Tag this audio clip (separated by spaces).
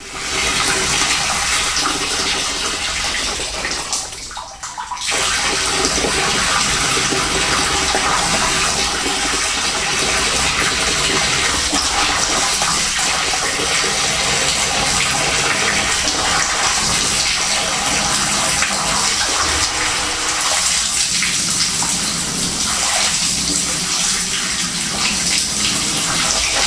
dragnoise fluids liquids LiquidSky